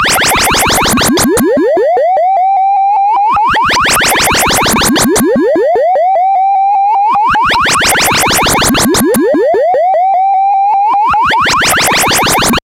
quantum radio snap124
Experimental QM synthesis resulting sound.
sci-fi, soundeffect, drone, noise, experimental